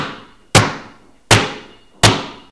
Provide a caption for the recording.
eduardo balon 2.5Seg 17
ball, bounce, bouncing